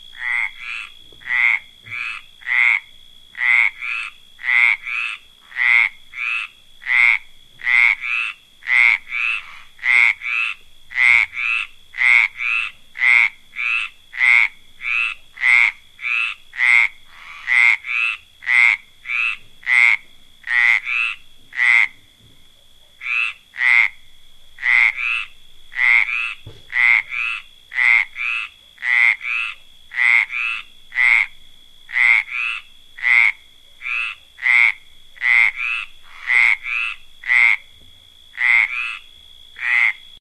tree frog duett
Two male European tree frogs hidden in a bush, croaking in duett during the mating period,St.Sernin,France 1999.Mono-mic, Dat-Recorder
field-recording, ambient, frog